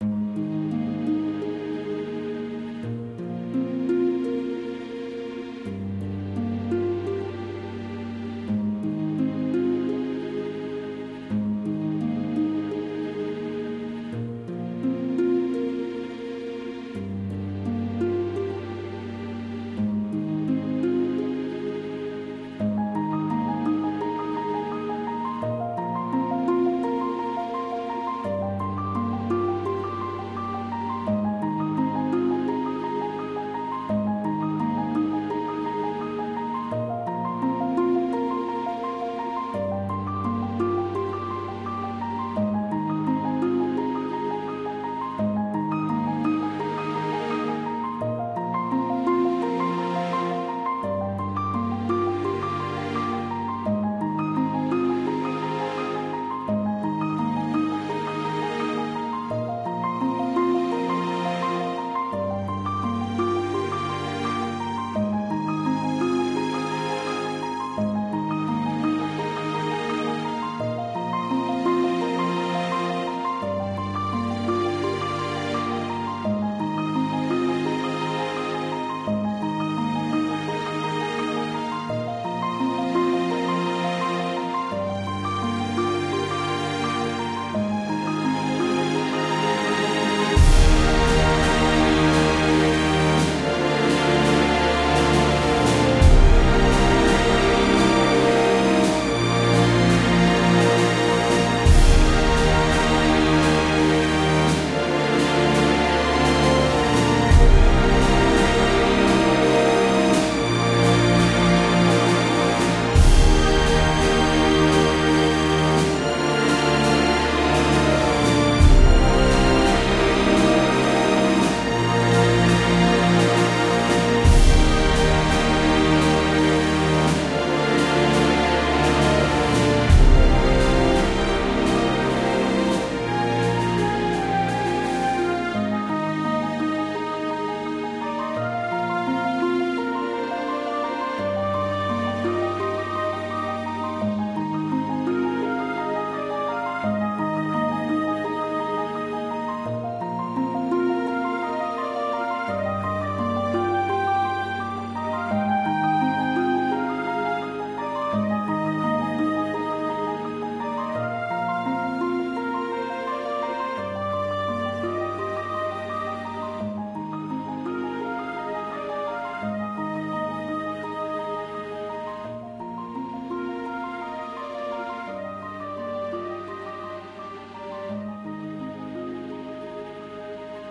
Emotional Background Music Orchestra (Adventure Type)
Genre: Emotional, Orchestra
Good for a menu screen on adventure type of game.
Emotional, adventure, percussions, string